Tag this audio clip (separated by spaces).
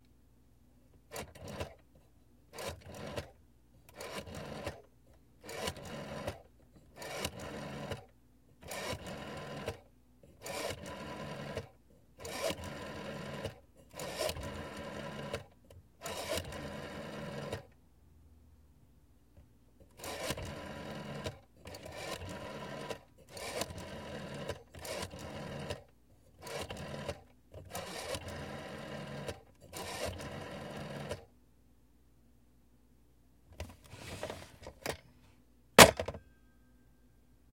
numbers continuum-4 sound-museum electronic call